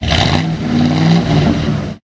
A Ford 460 V8 engine revving.